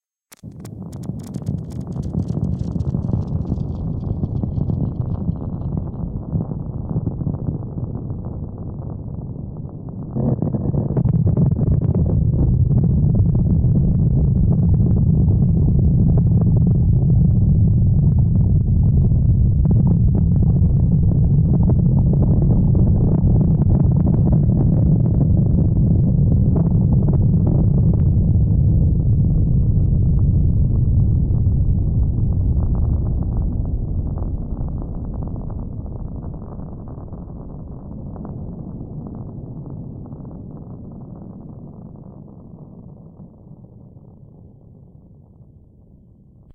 sound of earthquake, synthesized with some Cubase vst
earthquake, fx, stampede